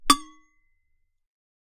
Metal water bottle - hit with lid
Hitting a metal water bottle with its own lid.
Recorded with a RØDE NT3.